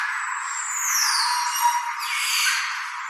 Song of a Green Oropendola. Recorded with an Edirol R-09HR. This has been filtered to remove people and other background noise, but the bird is still clearly heard.
aviary, bird, birds, exotic, field-recording, oropendola, tropical, zoo